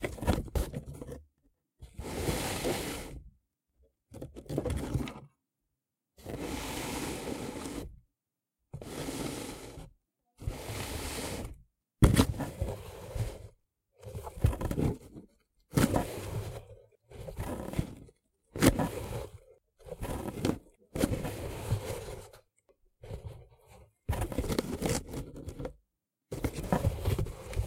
Sliding, opening, and generally rustling a medium sized cardboard box. Recorded from within. Recorded on a Tascam DR-40 for my own use but hopefully someone else finds it useful as well!